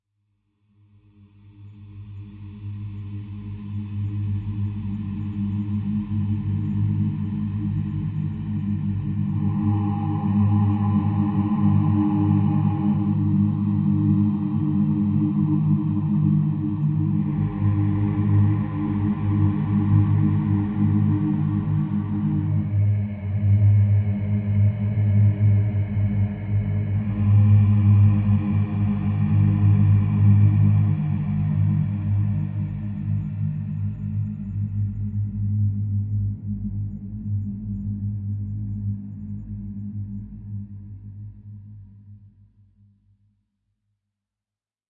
An ethereal sound made by processing a acoustic & synthetic sounds.
relaxing, blurred, floating, synthetic-atmospheres, ethereal, emotion, atmospheric, ominous